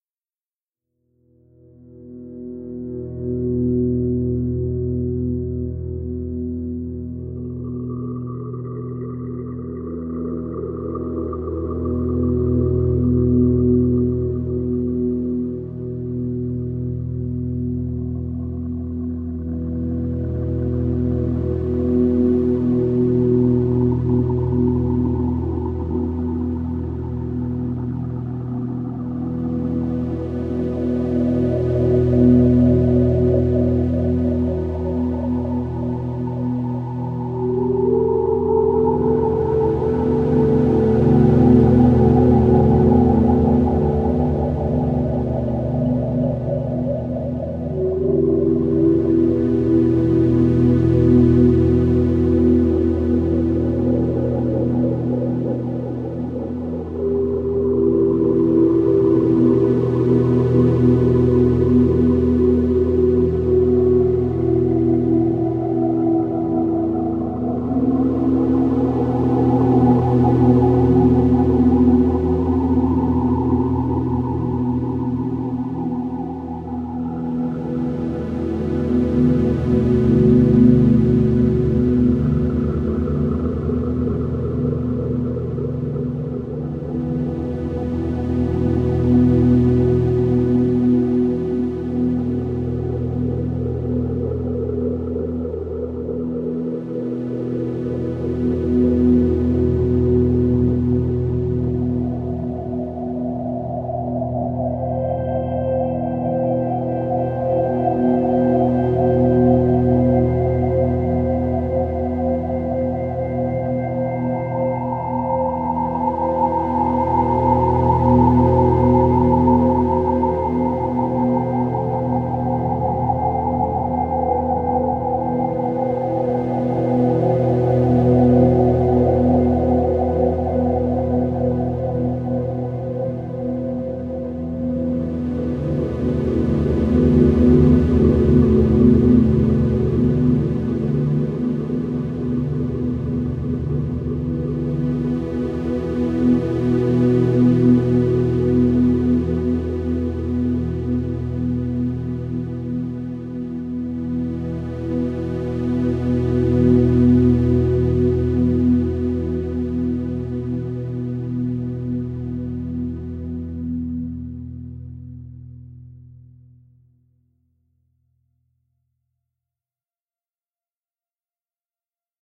purgatory ambient
A slow atmospheric ambient track suitable for creating dreamy, dark and sinister feelings!
cold, purgatory, movie, ambient, thrill, suspense